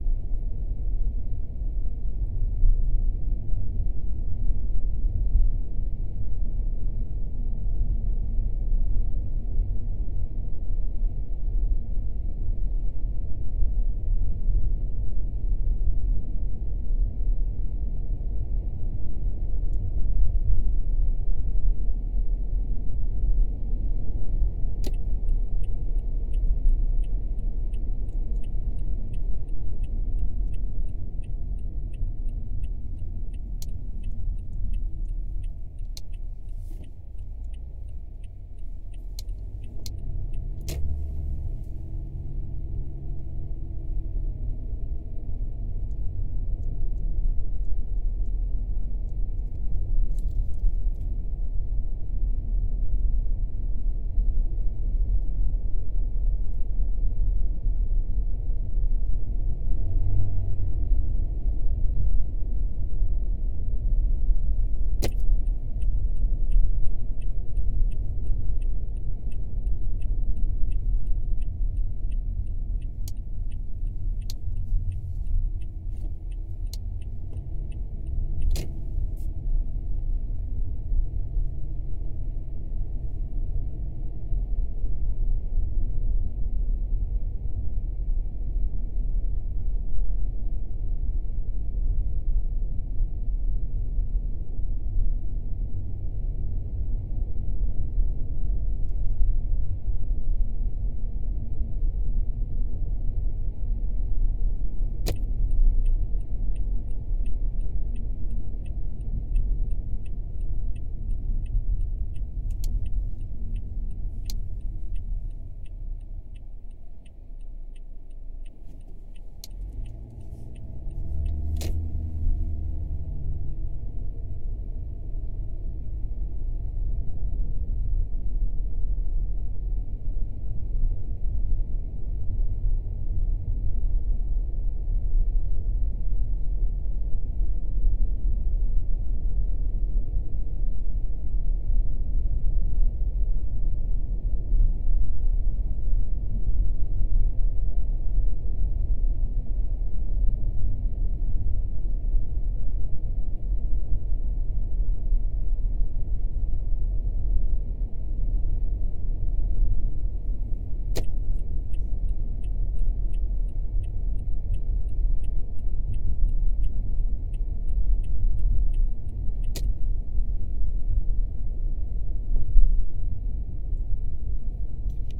There are 2 recordings of signaling and U-Turn, trying to maintain consistent engine sound. Each set has several seconds of engine noise, followed by an aggressive turn signal, and then some wheel turn action.